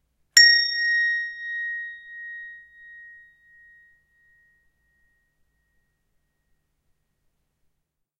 Hand Bells, A#/Bb, Single
A single hand bell strike of the note A#/Bb.
An example of how you might credit is by putting this in the description/credits:
The sound was recorded using a "H1 Zoom V2 recorder" on 15th March 2016.
A, A-sharp, Bb, bells, bright, instrument, percussion, sharp, single